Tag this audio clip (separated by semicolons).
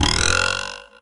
ambient; effect; jew-harp; musical; pcb; tech; trump